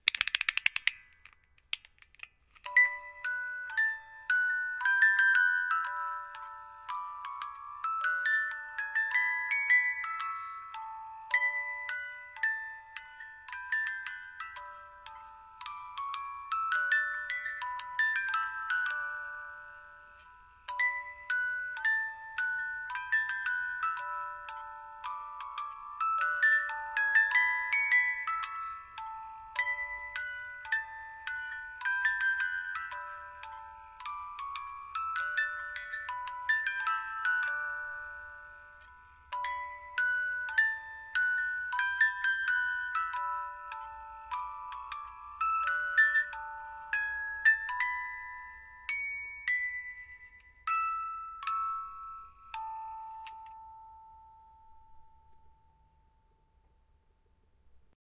Stereo recording of a mechanical music box from a "KIDS II" infants toy. Recorded in my closet using a Rode NT4 X-Y stereo mic through a Korg D32XD recording desk.
kids-ii, music-box